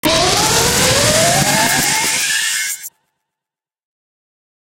Electronic Sweep Up 1
An old digital camera lens adjusting on power up. Recorded with Tascam DR 05. Processed in Logic Pro 9 using a plate reverb, delay, and automated pitch shifting sweeping upward.